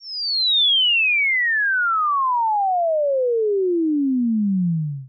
Cartoon Falling Whistle
A cartoon-ish falling sound created by me in Chiptone.